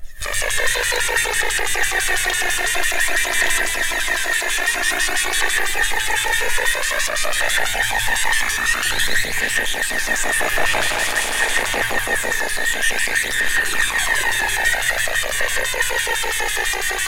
Vibrating buzzer. It can added another extra sound on it and develope an alien noise signal. Also slow down the pitch and create robot languages.
buzzer-background, robot-vibrating, Vibrating-buzzer